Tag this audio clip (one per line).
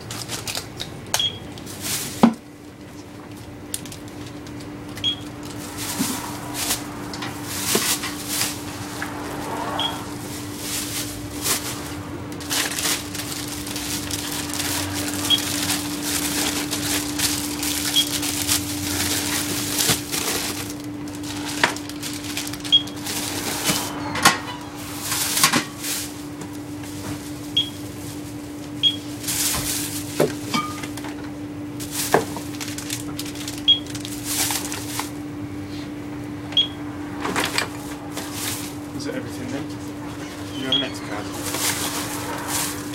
field-recording
pack
bags
scanner
beep
checkout